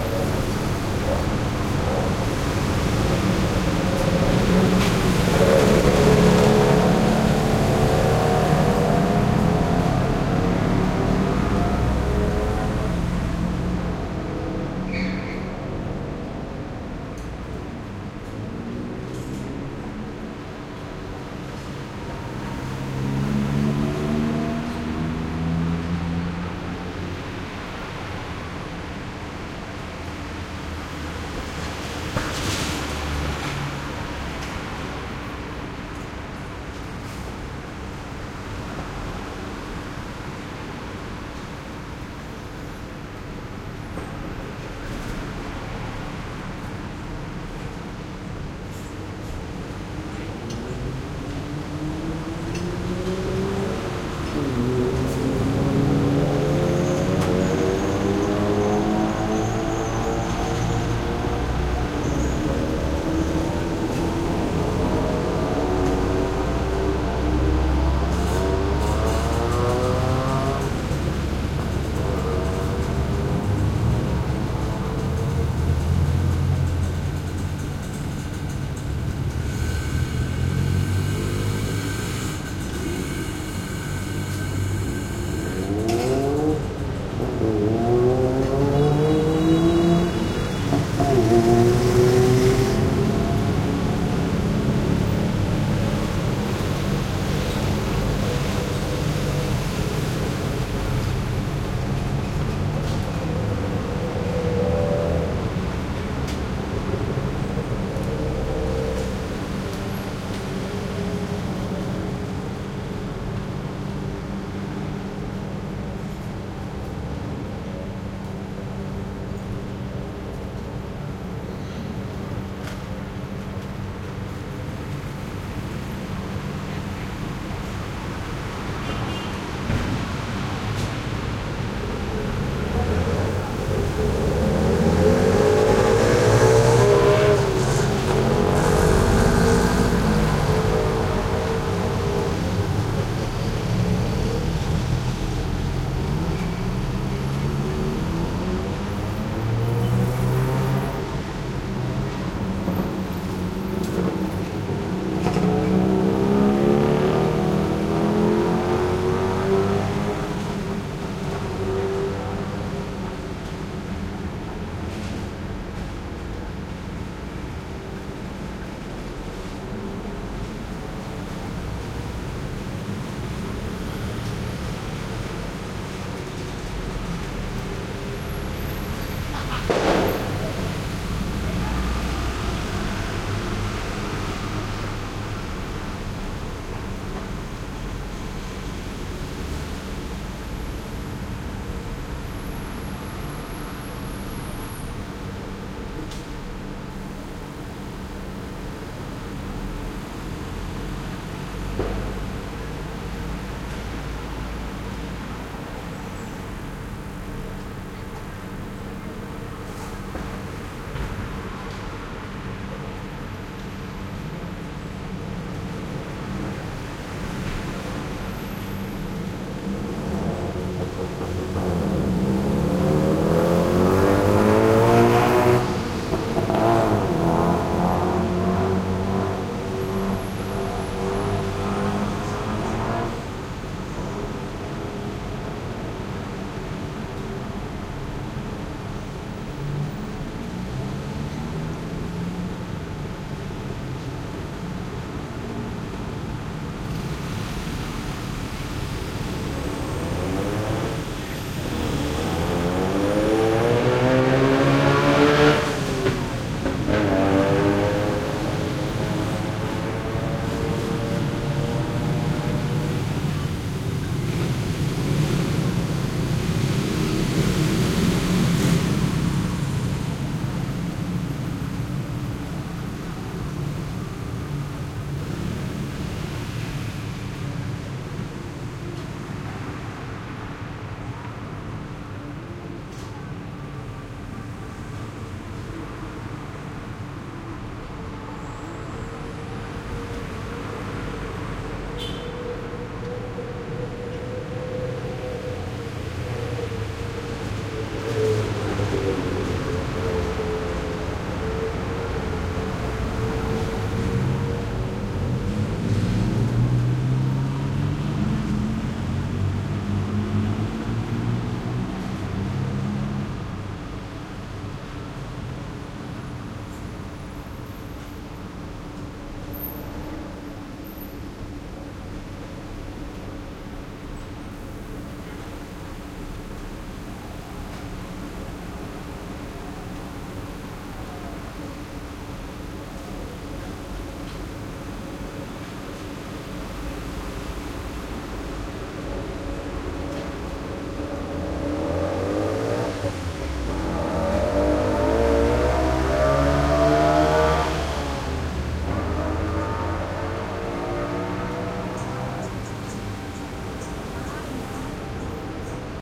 Thailand Bangkok traffic light morning motorcycles from 2nd floor window heavy echo tight street1
Thailand Bangkok traffic light morning motorcycles from 2nd floor window heavy echo tight street
field-recording, Bangkok, morning, motorcycles, traffic, Thailand